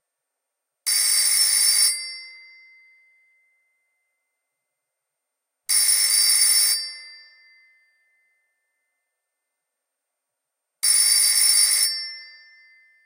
german old telephonering 1
Old German telephone ringing. Vivanco EM35 with preamp into Marantz PMD 671.
household, ringing, ringtone, bell, phone, telephone, ring, german